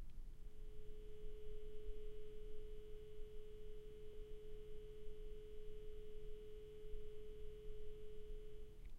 Dial tone 2
A dial tone from a phone.
office, work, tone, dial, Phone, ambient, dialtone